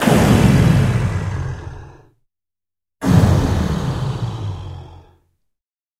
Dragon scream made from voice and effects in Audacity. Mostly time skew used. These tutorials allow you making similar sounds: Monster Laugh, Monster Voice, Lion Roar.